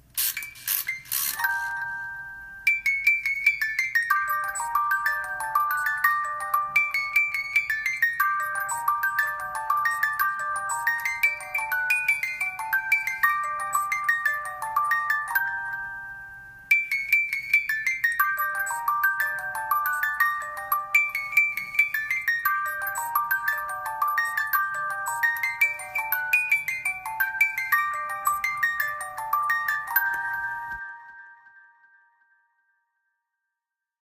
An automatic music box playing Beethoven's Fur Elise. Recorded with an iPhone, added compression and a little tape echo.